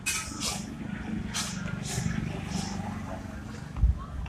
Registro de paisaje sonoro para el proyecto SIAS UAN en la ciudad de Palmira.
registro realizado como Toma No 06-ambiente 2 parque de los bomberos.
Registro realizado por Juan Carlos Floyd Llanos con un Iphone 6 entre las 11:30 am y 12:00m el dia 21 de noviembre de 2.019
06-ambiente, 2, No, Of, Paisaje, Palmira, Proyect, SIAS, Sonoro, Sounds, Soundscape, Toma